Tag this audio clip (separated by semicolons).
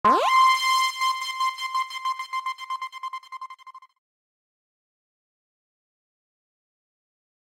ampitude; clean; fx; modulation; sfx; stab; sythesised